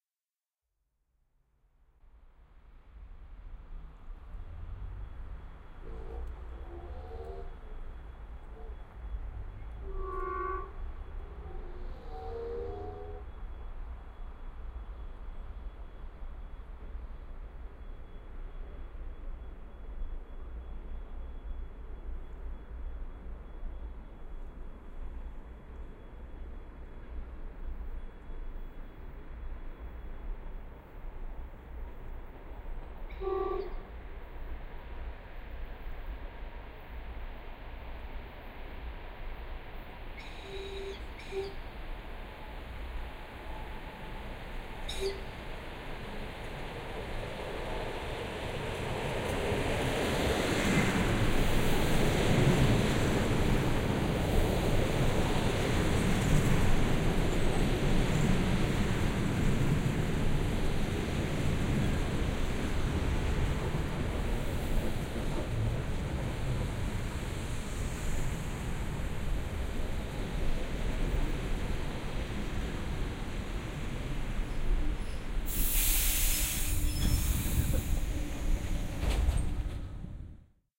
Train arriving at Khotkovo station around 18.30 10 Oct 2021